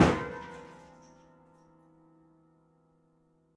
Swing with bat at piano